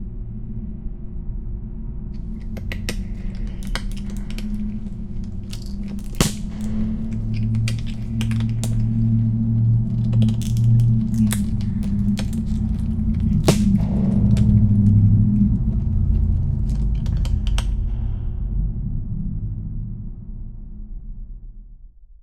CR SharktoGnawAmb
A Sharktopus gnawing on bones in his cave/lair. Includes cave ambiance.